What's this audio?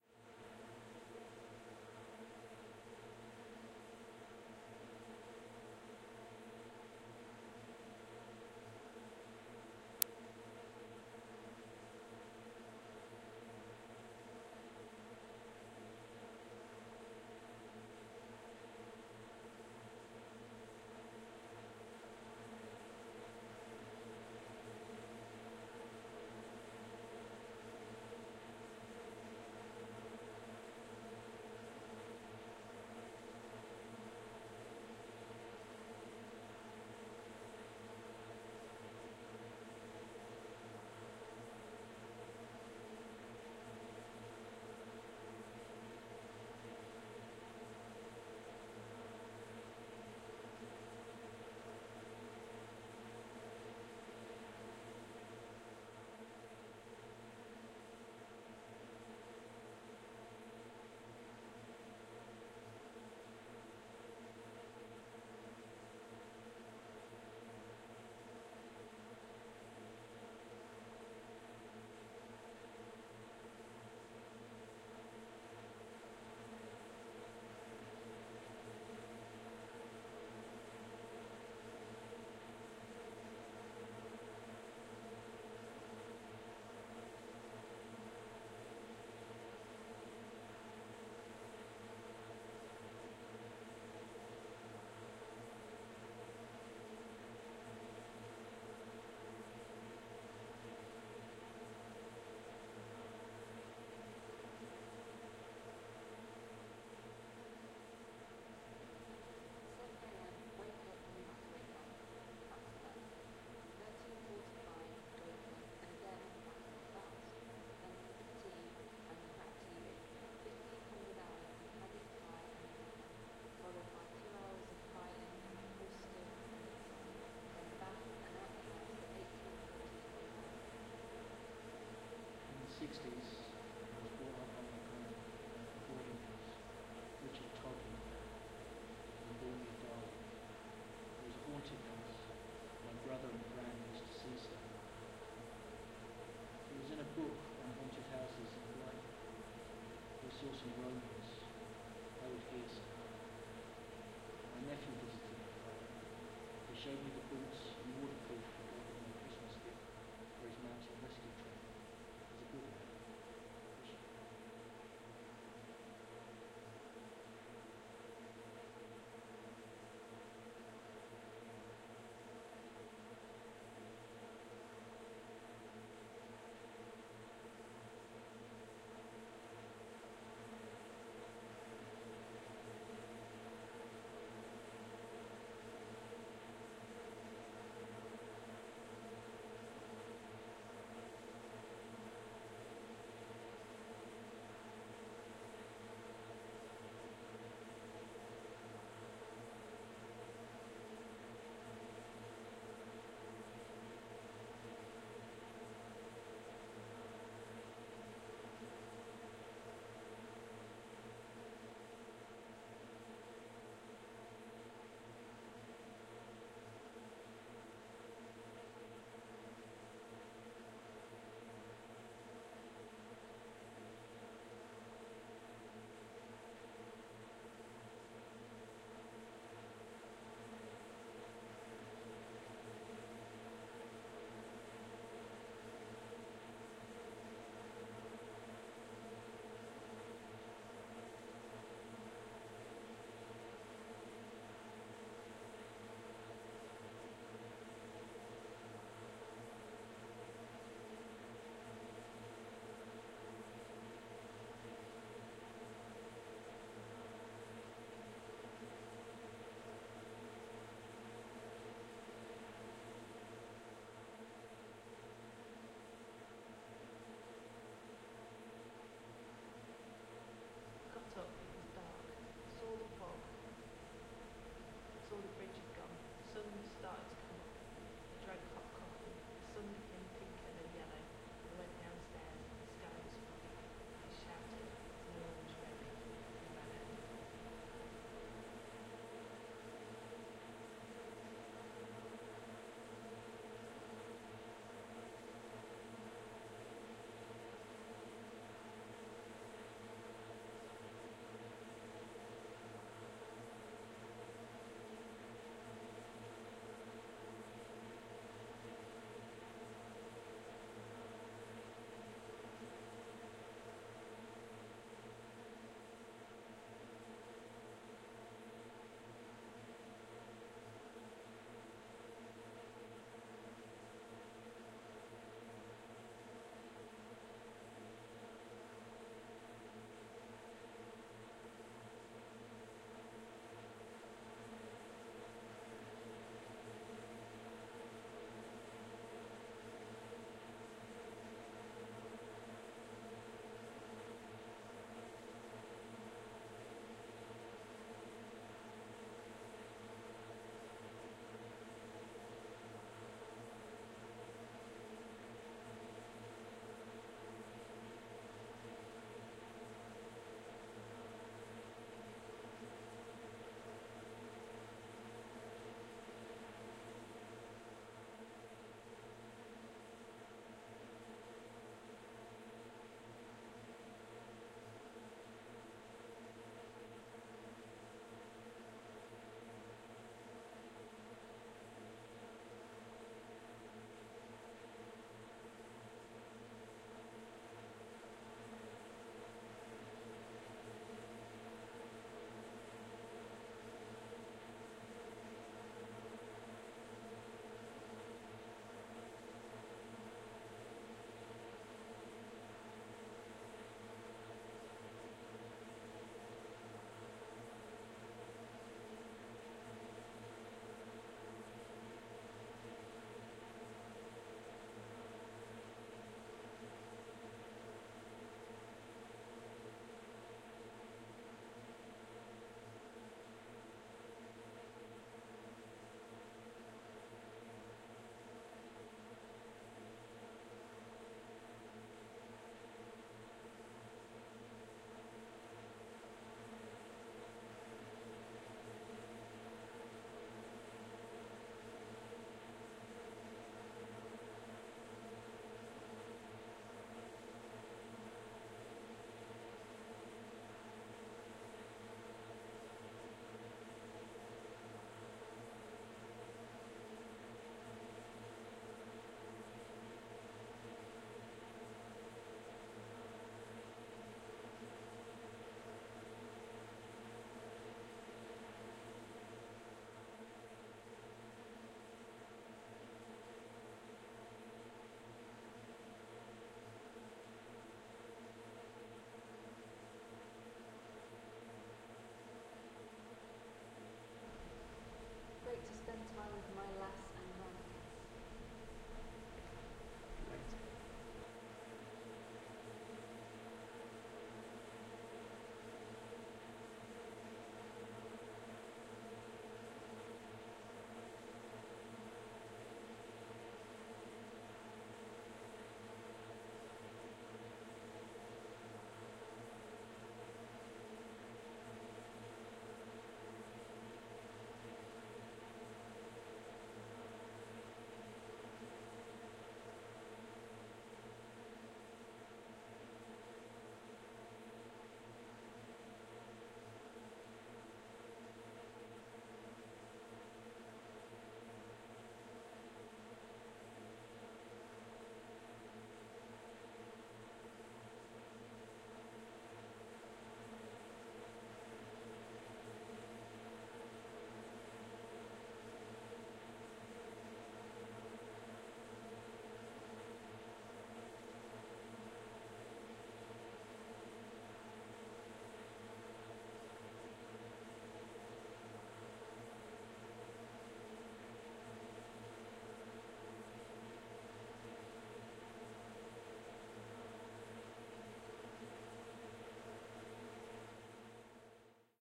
drone composed from Noizechoir's layering of pages of overheard conversations read in plainsong
orange, composite, narrow, sodium, choral, plainsong, drone